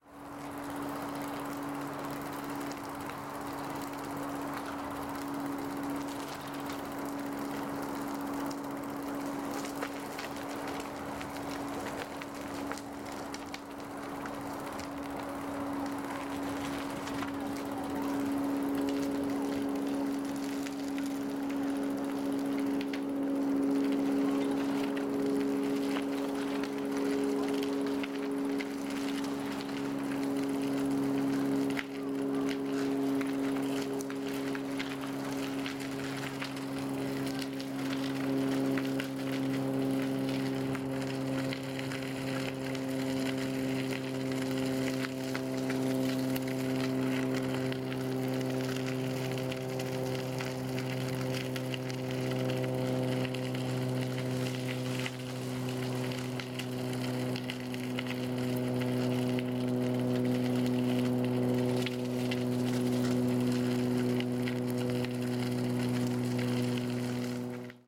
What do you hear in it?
001 bicycle,aeroplane,road
With a bike, the aircraft flying in the background.